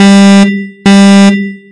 Alarm-04-Short
Alarm to use with a loop